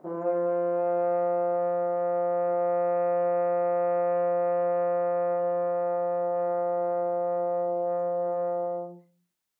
One-shot from Versilian Studios Chamber Orchestra 2: Community Edition sampling project.
Instrument family: Brass
Instrument: F Horn
Articulation: sustain
Note: E#3
Midi note: 53
Midi velocity (center): 42063
Microphone: 2x Rode NT1-A spaced pair, 1 AT Pro 37 overhead, 1 sE2200aII close
Performer: M. Oprean
brass, esharp3, f-horn, midi-note-53, midi-velocity-105, multisample, single-note, sustain, vsco-2